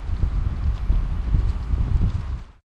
newjersey longport wind
Windy recording of the bay from longport facing south recorded with DS-40 and edited in Wavosaur.
bay, field-recording, longport, new-jersey, wind